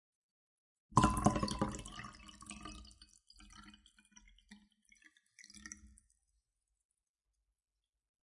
Pouring a liquid into the glass, the final part.
beverage, drink, fill, glass, liquid, pour, pouring